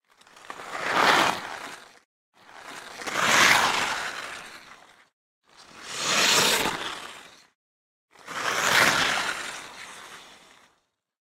hockey outdoor player skate by various
by, hockey, outdoor, pass, player, skate